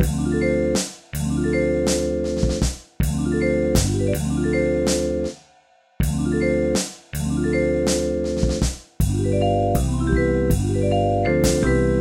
A jazzy vibe thing i did while i was bored, hope you enjoy
Created using FL Studio, and a yamaha vibraphone
Vibes, Third-tag, Drums